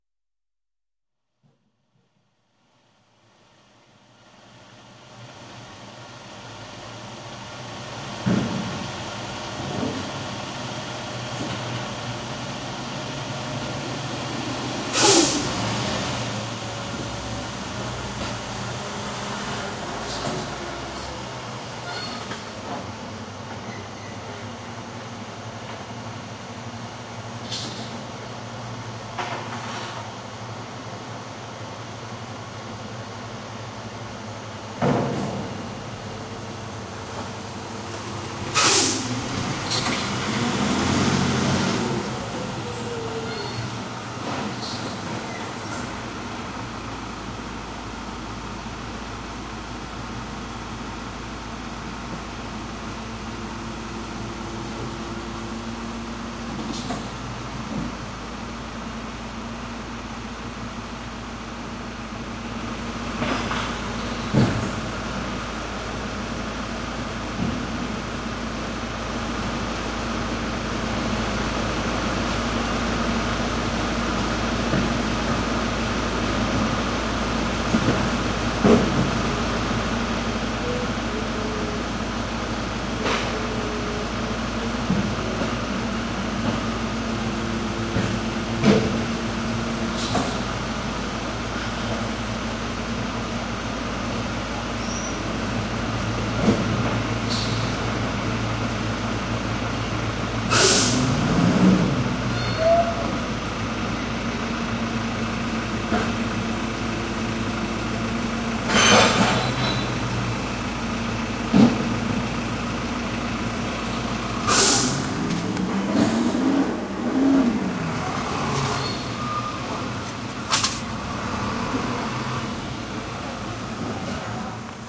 Stereo recording of a garbage truck on my street in Montreal. Recorded with a Song PCM-D50.
garbage, truck